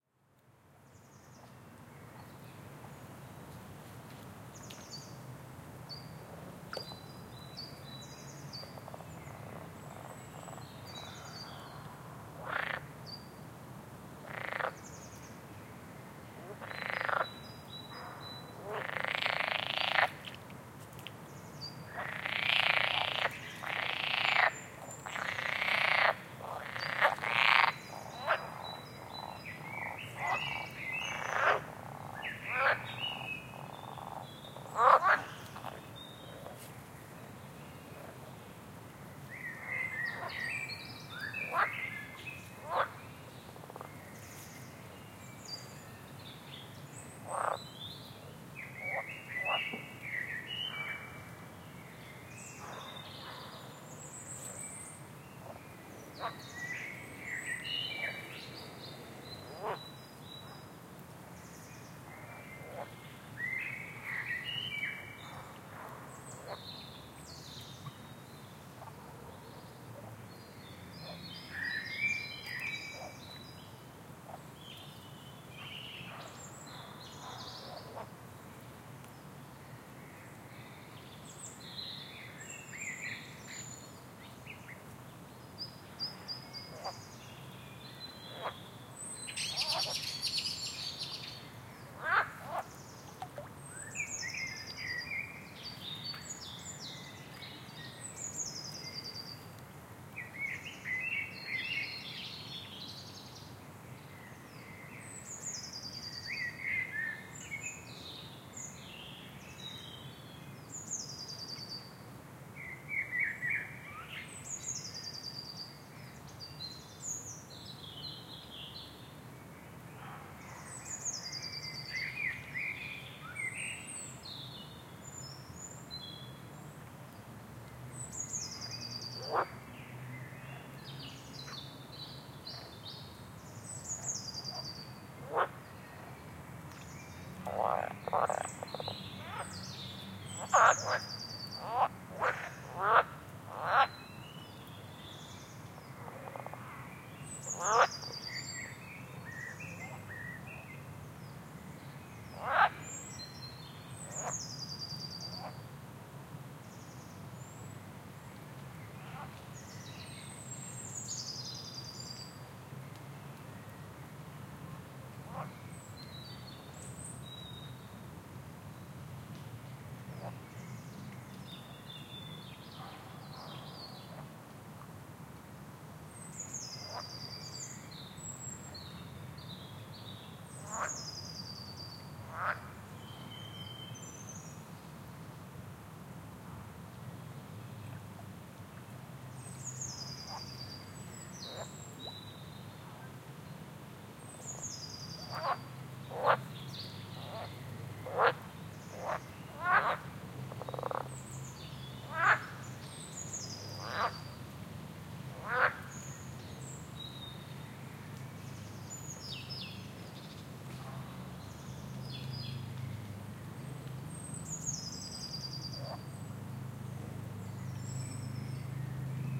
frogs and birds
I wanted to have some clean closeup recordings of frogs. this is not so easy to do in a city. but I think this recording is ok.
binaural-recording, bird, field-recording, frog, meditation, nature, outdoor, relax, spring